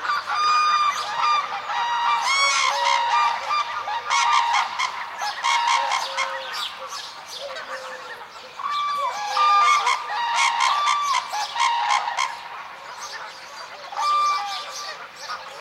Crane calls recorded at Canada de los Pajaros, a bird sanctuary near Puebla del Rio, S Spain. Common Sparrow chirps and Collared Dove cooing in background. Sennheiser ME66+MKH 30 into Shure FP24, recorded in Edirol R09 and decoded M/S stereo with Voxengo VST free plugin.
field-recording, nature, south-spain